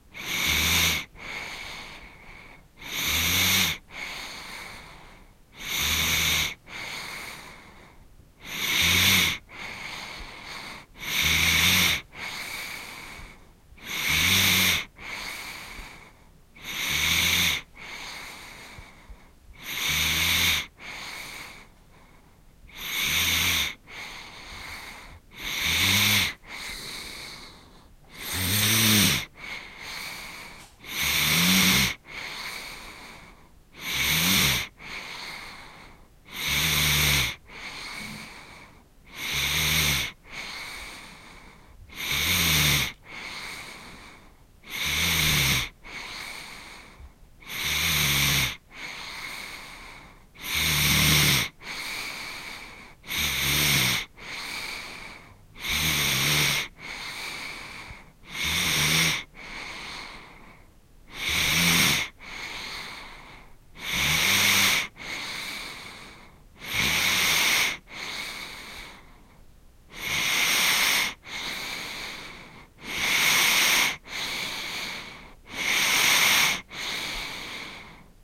The breathing of a sleeping child. Recorded with a zoom h2n in X/Y stereo mode
breathing, child, field-recording, respiration, sleep